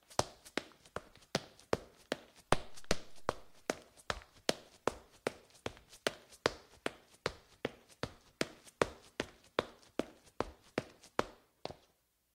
Sneakers on tile, running